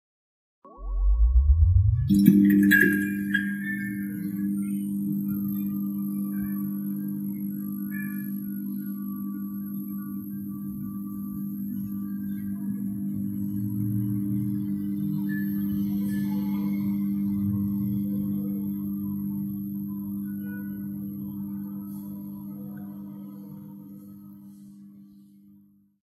SFX MAGIC APPORTATION
for a magic effect
APPORTATION, SFX